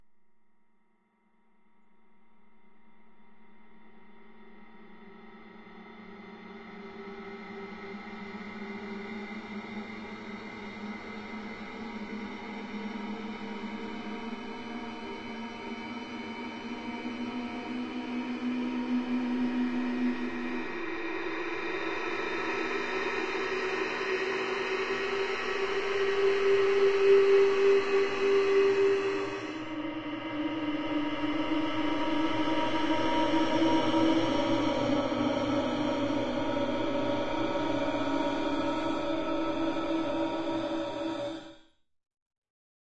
Just some examples of processed breaths form pack "whispers, breath, wind". This is a granular timestretched version of a breath_solo sample with some artefact's from Logic Pro's platinumverb reverb (multiple layers and tape-delays added), resulting in 'bell-like' filtering.